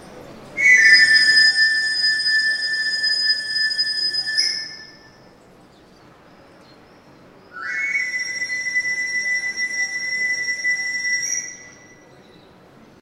20060625.knife.sharpener
the whistle used by an itinerant knife sharpener, recorded in a narrow street in Sevilla, Spain /el silbato de un afilador, grabado en una calle estrecha de Sevilla
city, streetnoise, field-recording, whistle